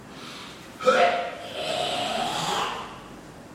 20061013.good.mornig.papa
WARNING: Disgusting sound. An old man in my neighbourhood clearing his throat. / mi vecino viejo gargajeando.
phlegm, oldman, revolting